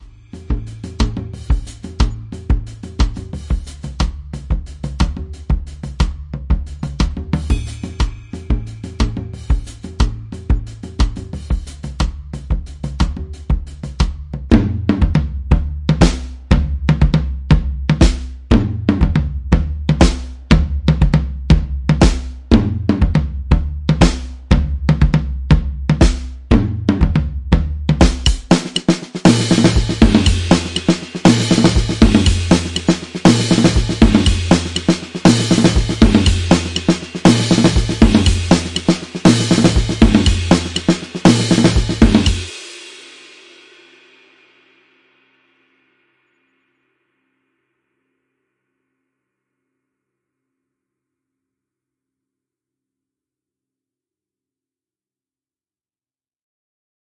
ADDICTIVE DRUMS PRACTICE
Just something I put together for fun with my new Addictive Drums-2 program. I used Audacity to drag and drop and then sent it to my Sony editing. I duplicated the tracks for a total of three. I like how it sounds and hope you do too. Thanks. :-)
beat, toms, cymbals, Drums, tom, marching